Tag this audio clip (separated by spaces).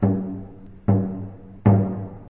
drum foley hit lescorts low three